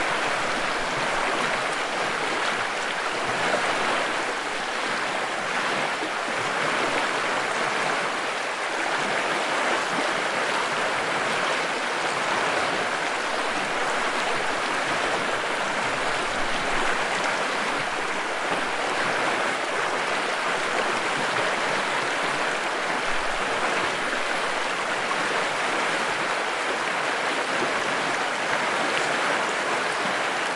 River, stream, creek, sound of waves, moving water, field recording.
Recording device: Roland R-26 portable digital recorder.
Microphone: Built-in directional XY stereo microphone.
Edited in: Adobe Audition (adjusted gain slightly, for a good signal level).
Date and location: October 2015, a fast-flowing creek on an ordinary autumn day in Sweden.
creek, outdoor